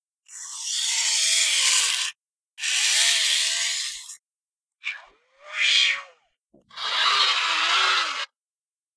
robot arms
robot arm sounds recorded in audio booth confederation college
robot-arm, bots, Foley, robot, phaser, space, droid, moving, wah-wah, class, future